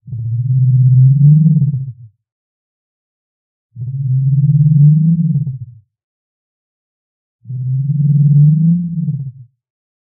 Large herbivorous creature of grasslands (female, angry) [3 PITCHES]
A sound for a large herbivorous creature (some kind of dinosaur) that dwells in grasslands, for Thrive the game. Made from scratch using Harmor, Vocodex, and some other plugins from Fl Studio 10.
It has some reverb, resonance, vibrato and tremolo for more realism.